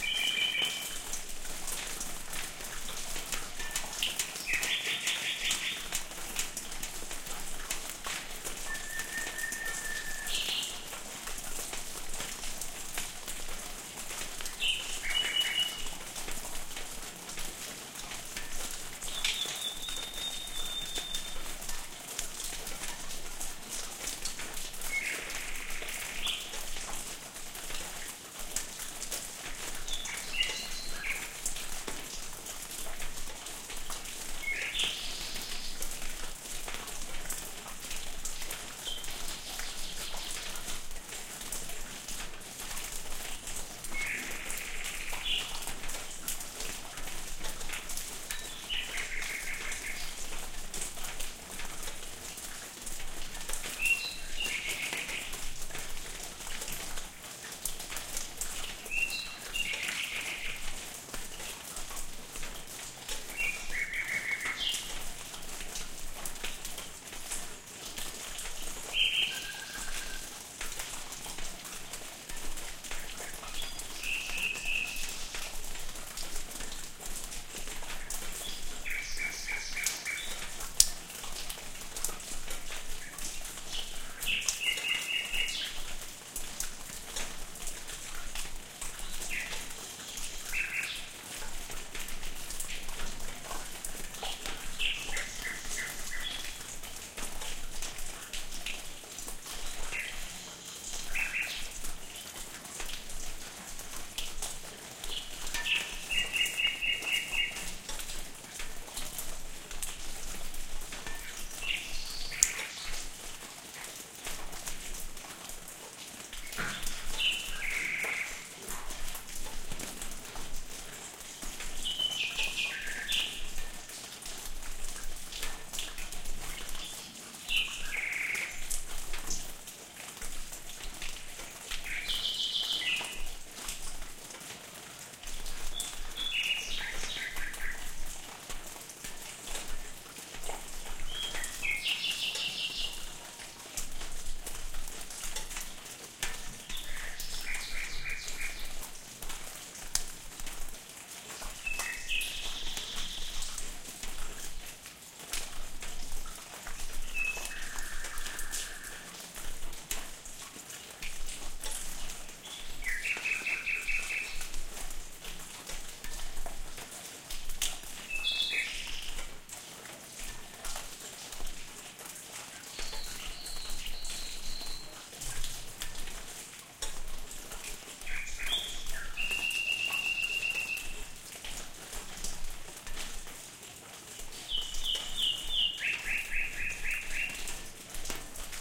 20110423 courtyard.rain
birds (Nightingale, Cuckoo among other) singing at some distance, then rain falling inside a patio. Audiotechnica BP4025, Shure FP24 preamp, PCM M10 recorder
birds, drip, field-recording, nature, rain, south-spain, splash, spring